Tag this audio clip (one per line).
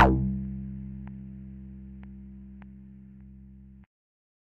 synth
303
tb
acid
one-shot